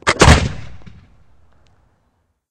My first upload! I noticed that there are no musket fire sound effects here and since I am a longtime user I thought I would contribute. This is a flint lock musket from 1770. Created this for a production of Treasure Island. Muskets like this used during the revolutionary war and civil war.
gun, gunfire, musket, treasure-island